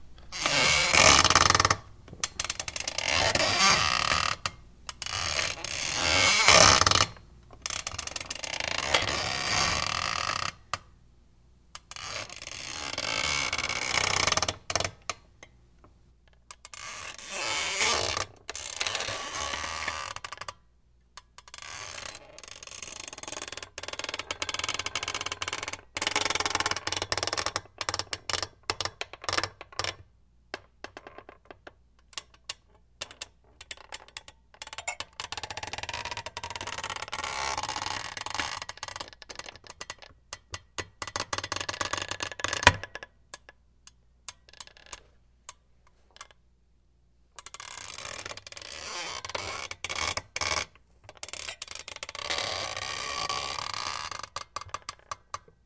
Squeaky Chair 01A
Close-mic of a super squeaky office chair. This version is medium to slow creaks.
Earthworks TC25 > Marantz PMD661
creak, groan, popping, pops, snap, squeak